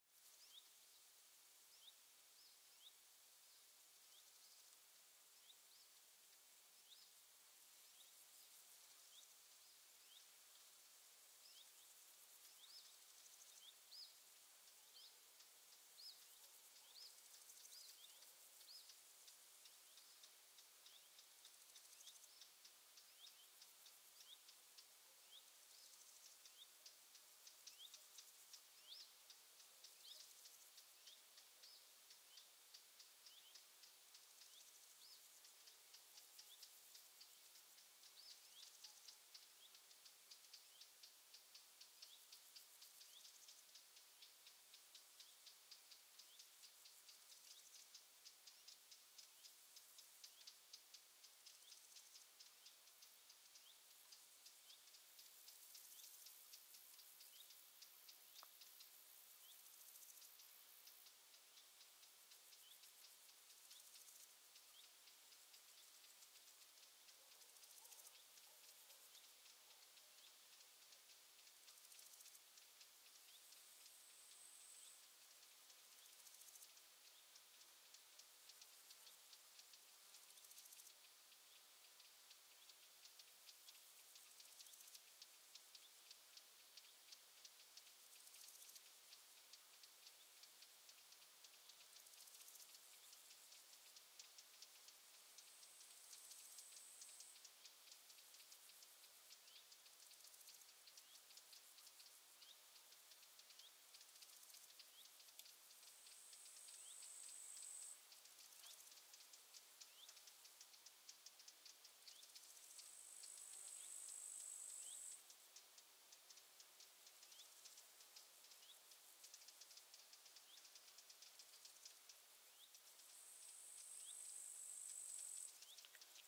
forest - end of the day